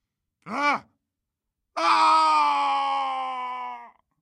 110 reus schrikt
A person (giant) is shocked and falling.
scream, man, short, vocal, foley, sounddesign, sound-design